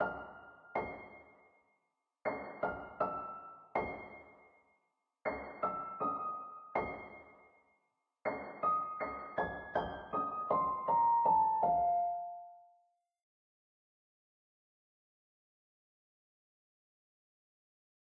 Dark loops 022 melody 80 bpm
piano, dark, bass, loop, loops